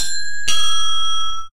My attempt at simulating a Football(Soccer) Stadium PA Announcement. The ding dong. Played in using a Midi keyboard and a vst and effected heavily to try and achieve the effect of the pa.
announcement, ding, dong, football, pa, request, soccer, stadium